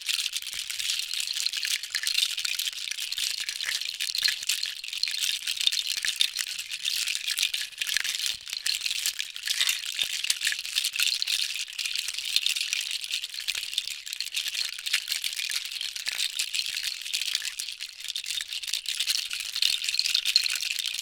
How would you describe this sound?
hard Loop sample for a wood and seeds rattle for virtual instruments